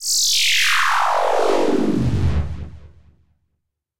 A synth sweeping between 6 octaves starting at C and descending through C major
synth, sound, effect, sweep